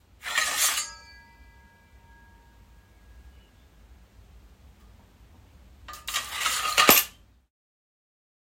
Sword being taken from scabbard
Sound effect of a naval sword being removed from scabbard.
metal, owi, Sword, scabbard, sfx